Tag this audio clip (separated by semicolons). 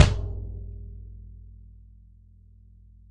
1-shot; drum; velocity; multisample